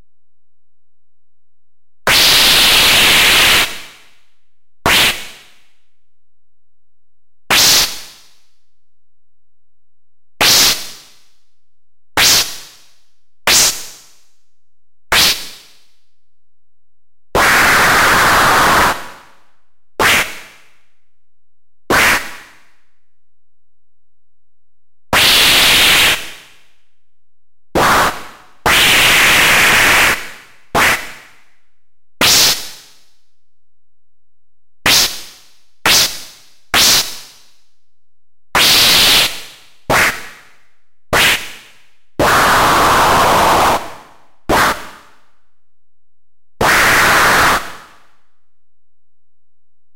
SYnth NoisesAN
Even MORE SYnthetic sounds! Totally FREE!
amSynth, Sine generator and several Ladspa, LV2 filters used.
Hope you enjoy the audio clips.
Thanks
Weird, Strange, Factory, Sci-Fi, Machine, Synthetic, Machinery